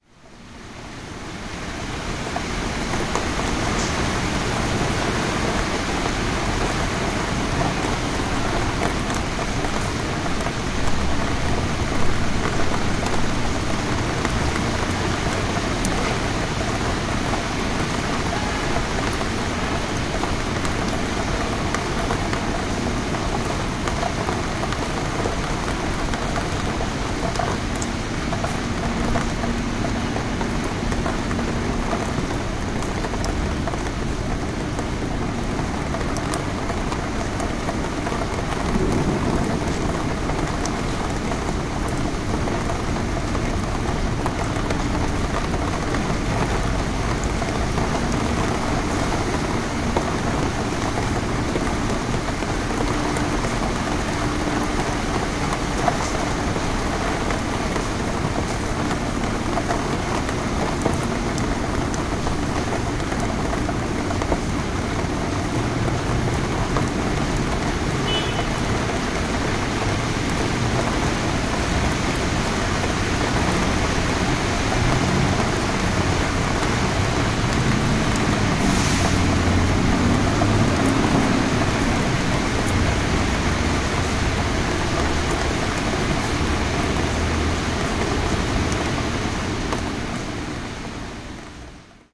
NYC RAINY EVE BWAY and 172
Rainy evening from window overlooking Broadway in Manhattan.
Steady rain sound, some spattering on windowsill at close perspective, some light traffic, distant low rolling thunder.
Unprocessed.